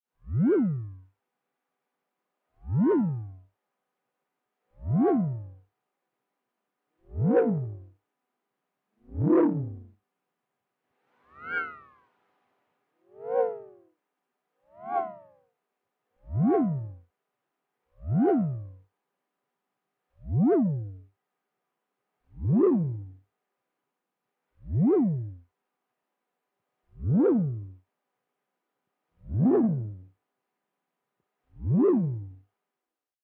Warm analog swipes
Synth-generated warm tones that sound like swipes.